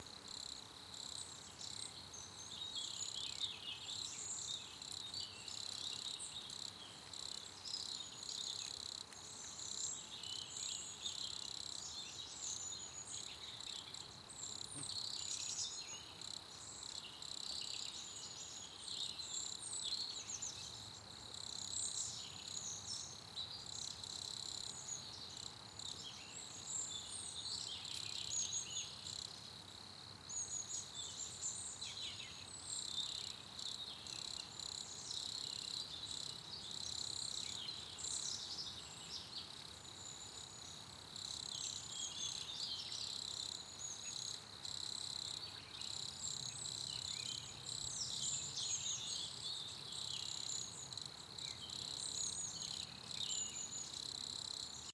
Microphone: Rode NT4 (Stereo)